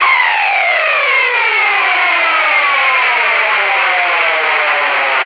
pickslide down in a phone
Pickslide with a phone eq. Apologies, I could not resist.
distortion, slide